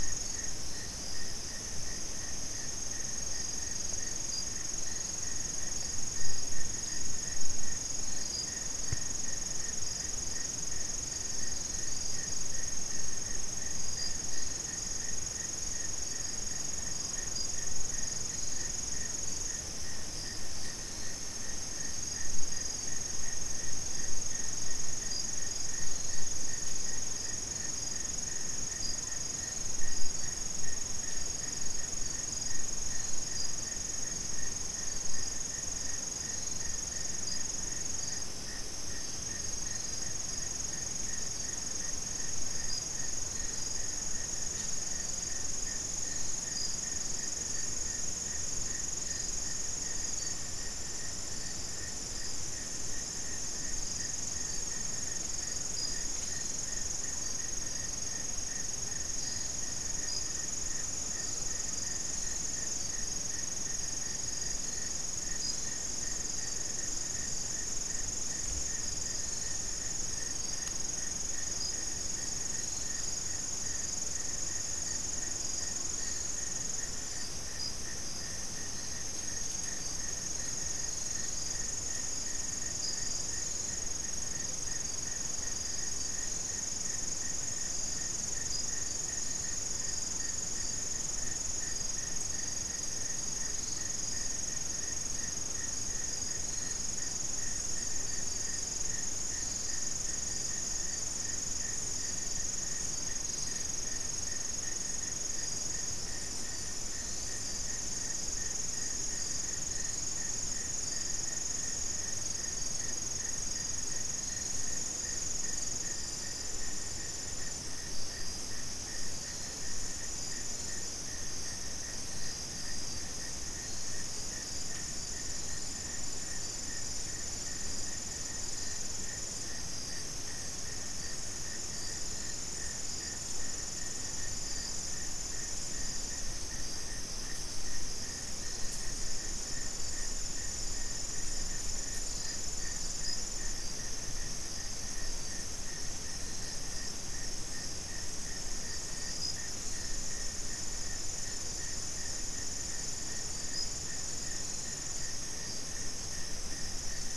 Forest Morning #2
Stereo field recording taken at 6:15AM deep in the woods of rural North Carolina on the eastern seaboard of the United States. Largely free of human sounds.